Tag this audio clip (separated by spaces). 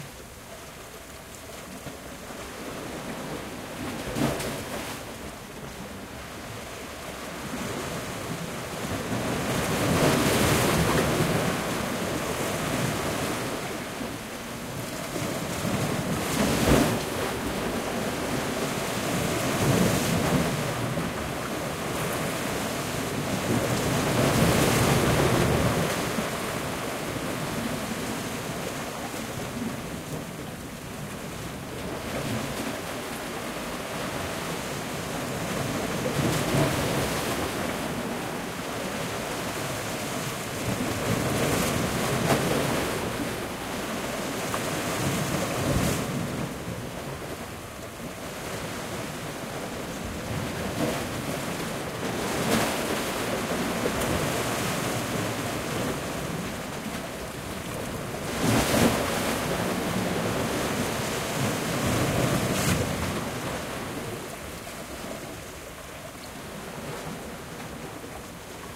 ambient beach breaking-waves coast coastal crashing lapping ocean relaxing rocks sea sea-shore seashore seaside shore splashes splashing tide wave waves